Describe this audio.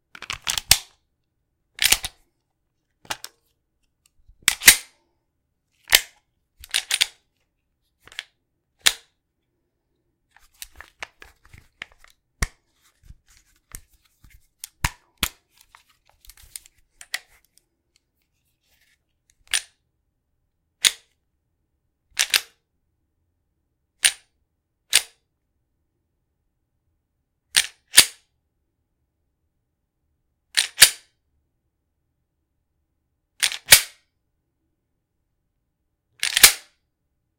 Taurus G2c Misc. Foley
Miscellaneous magazine release, reload, racking, and handling of my 9mm Taurus G2c. Multi-purpose. Recorded indoors using a Blue-Yeti microphone. Cleaned in Audacity.
9mm, action, firearm, foley, game, glock, gun, handgun, handle, handling, metal, military, misc, miscellaneous, pistol, police, rack, racking, reload, shooter, slide, Taurus, war, weapon